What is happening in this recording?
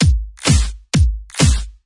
Electrohouse beat
complextro, electro, hihat, house, snare, techno